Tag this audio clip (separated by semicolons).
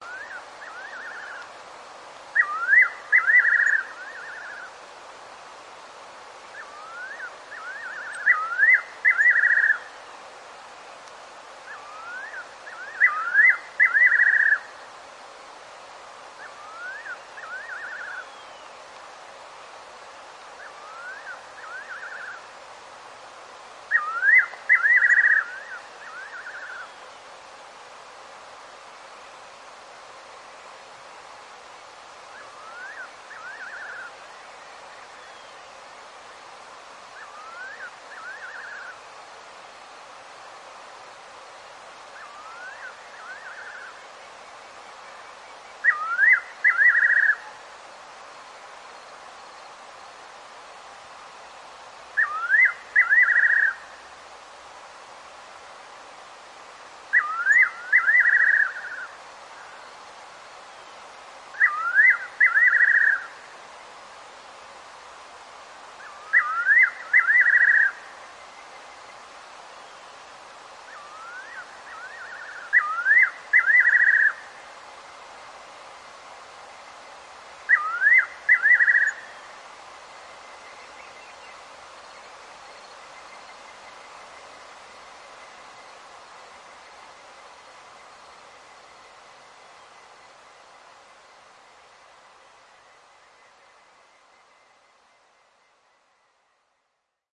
birds; field-recording; nature; night-birds